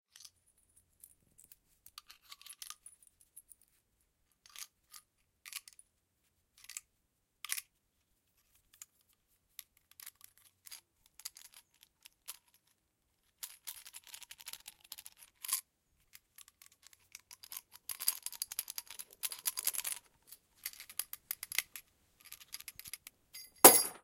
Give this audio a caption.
Shells and Nails
Recoreded with Zoom H6 XY Mic. Edited in Pro Tools.
Loading a 12ga shell with tiny nails, shaking it and finally dropping it.